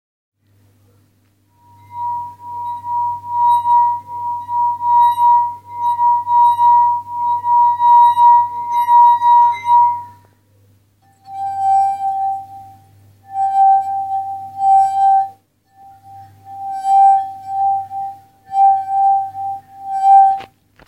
Crystal Symphony. Sinfonia Delicada
Sliding my dry fingers through a wet, glass cup of water half full.
fingering, glass, Glass-of-water, vibration, vibrator